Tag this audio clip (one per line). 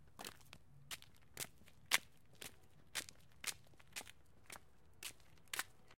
concrete
exterior
foley
footsteps
sandals